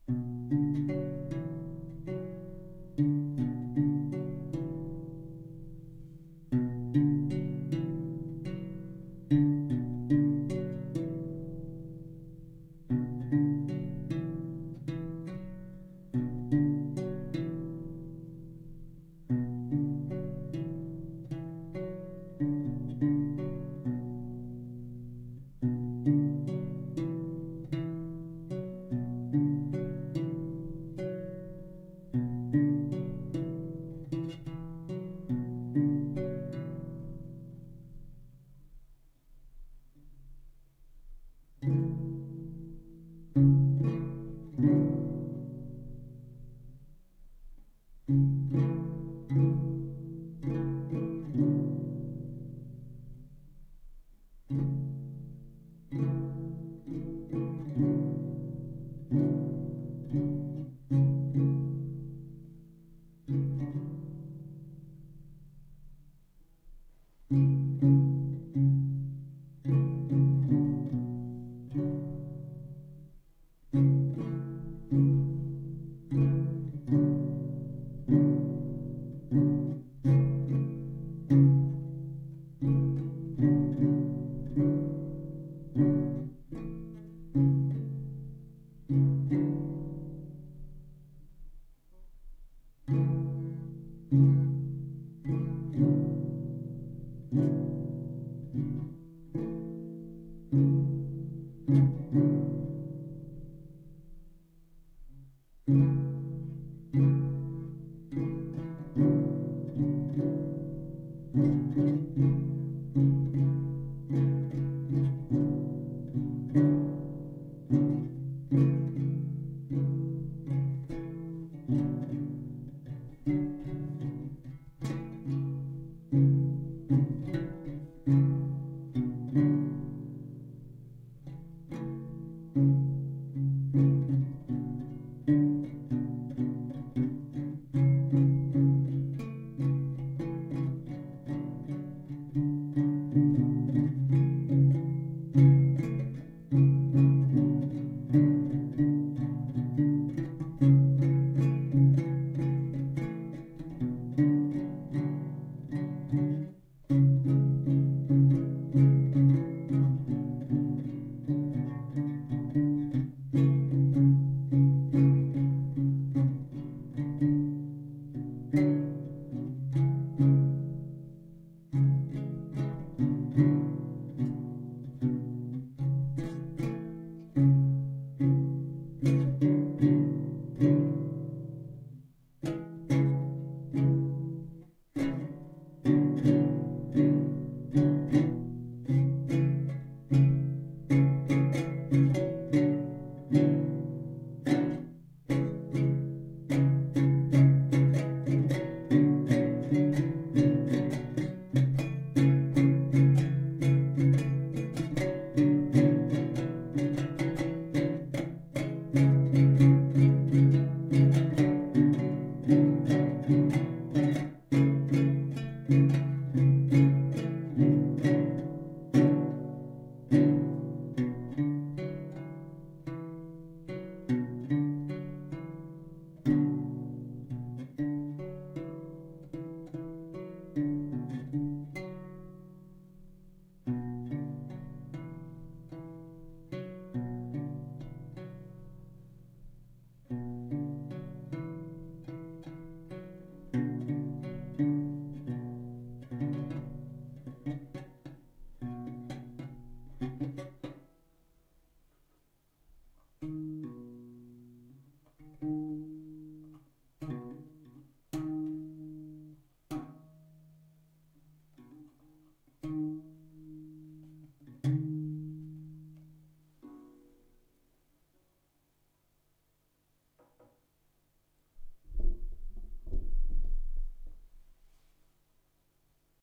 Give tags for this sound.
acoustic cuatro guitar guitars instruments kytara string stringed venezuelan

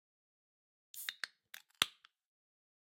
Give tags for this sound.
aluminum beer beverage can drink metallic object soda

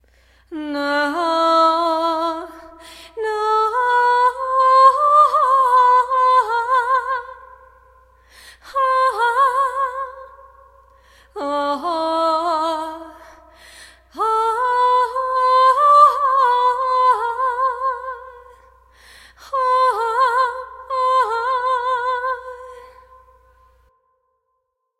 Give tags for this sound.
enigmatic female-vocal female

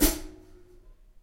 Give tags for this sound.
Drum from hits Individual Kit live my percussive recorded Tama

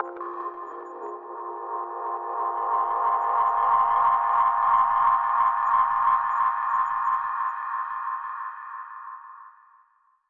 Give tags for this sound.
ambiance ambient atmosphere bass digital electronic extreme game loops lovely music processed project reverb reverbed samples sounds stretched synth

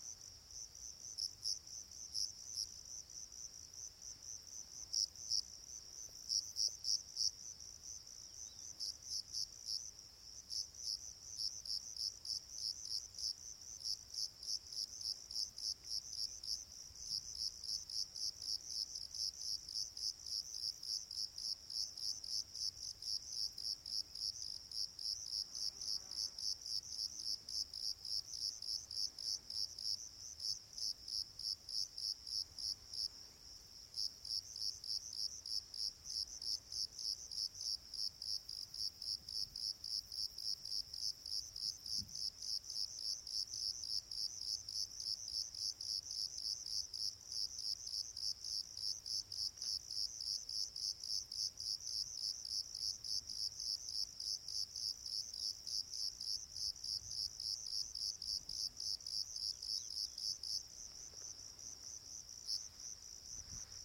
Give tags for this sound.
cricket donana field-recording insects marshes south-spain summer

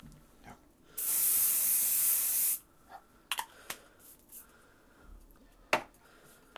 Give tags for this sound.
domestic-sounds recording spraying

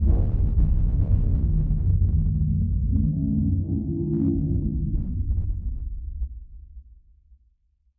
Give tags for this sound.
ambient dark drone FX psycho spectral

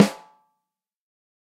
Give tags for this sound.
mapex drum sample pro-m beyer snare dynamic multi m201 velocity 14x5

